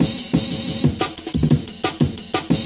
Old skool jungle break.